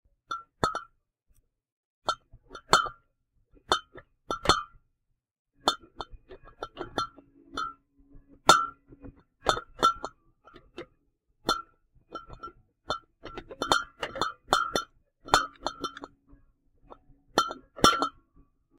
Store Wine Bottles Clinking
clink, crinkle, checkout, ambience, food, cooling, clunk, store, produce, can